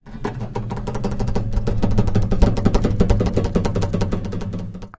Shaking Plastic Object
Weird plastic/metallic rumbling. Recorded in stereo with RODE NT4 + ZOOM H4.
metal, metallic, noise, plastic, rumble, rumbling